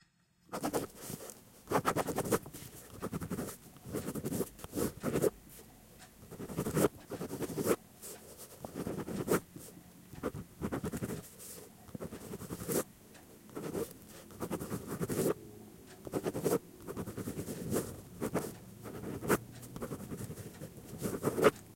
I honest had the mic too close, but good for an intense scene or something ha!